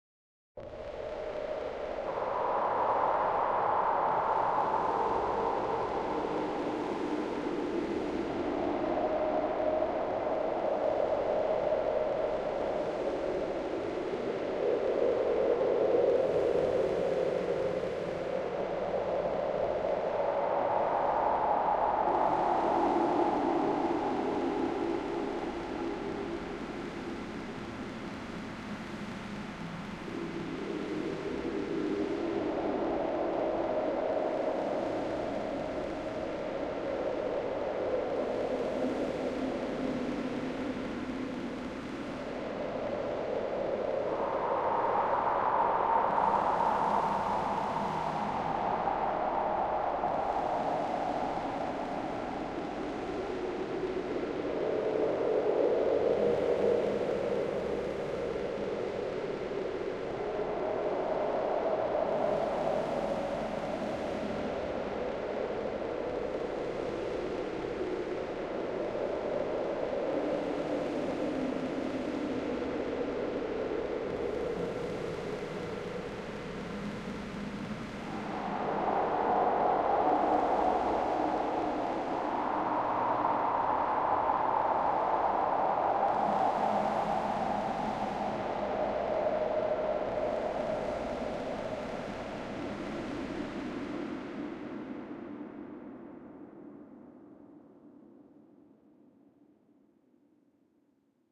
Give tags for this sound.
ambience ambient eerie horror scary

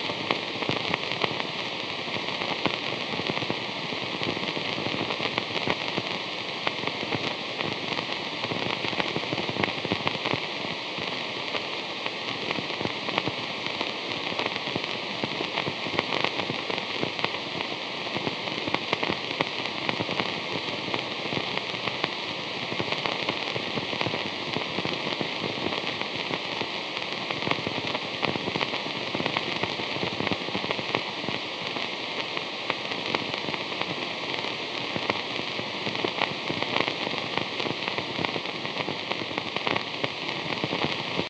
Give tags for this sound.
crackle vhs-hum general-noise background-sound white-noise vhs static ambient atmosphere loop ambience background

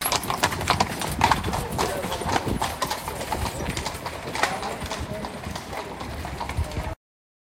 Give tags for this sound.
carriage field-recording gallop horse

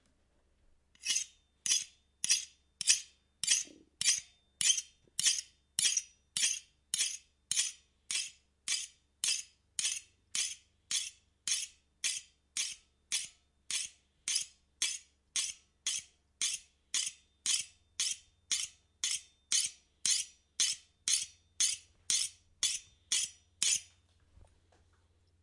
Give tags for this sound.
knife sharpen sound

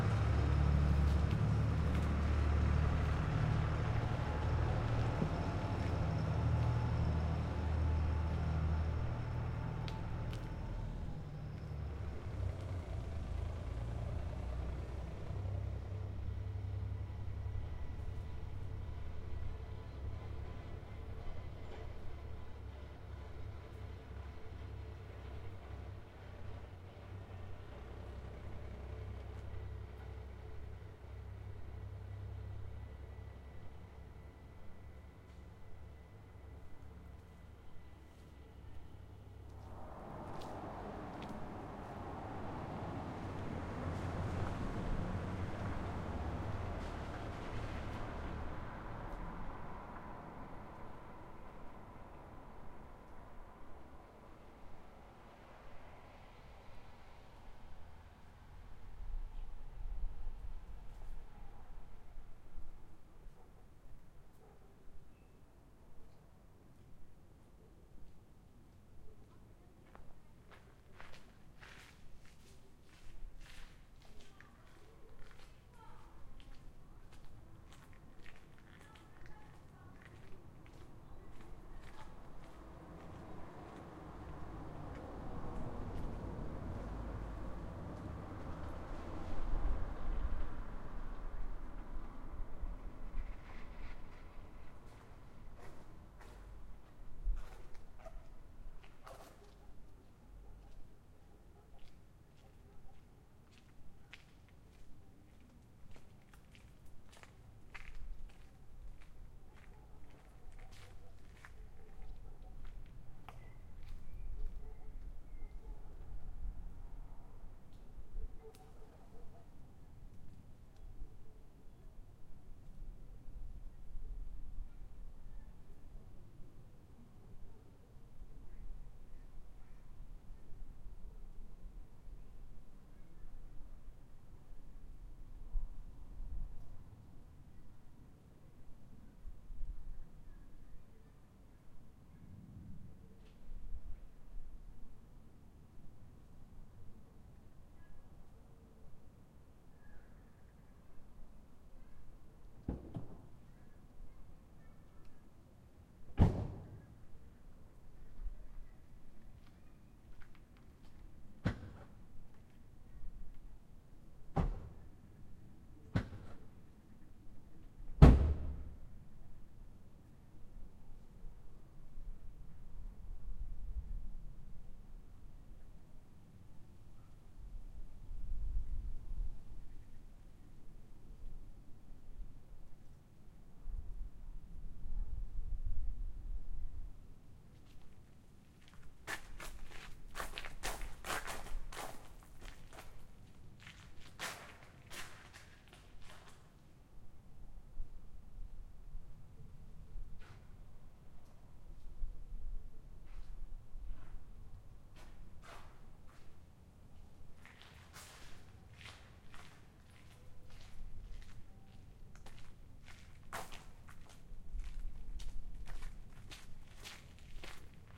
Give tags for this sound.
distan night passing voices quiet village square cars time

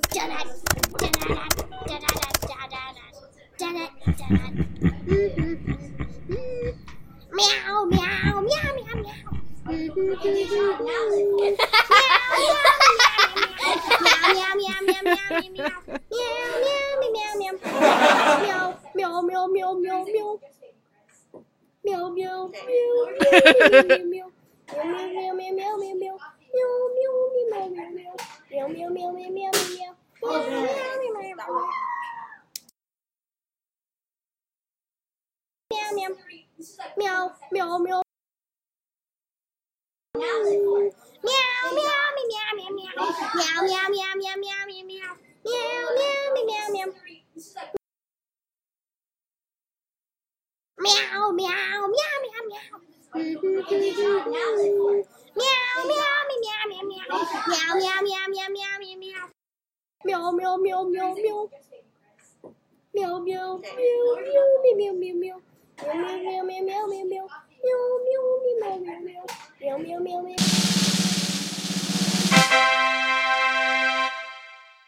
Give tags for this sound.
meow laughing human

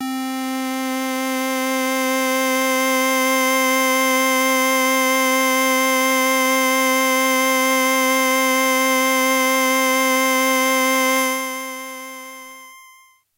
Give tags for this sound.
80s Casio HZ-600 preset sample synth

